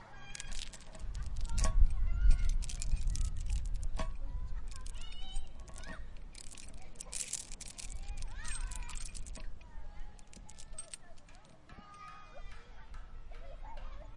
OWI Metal chains
Metal chains from a wooden bridge on a jungle gym
Chain, metal, wood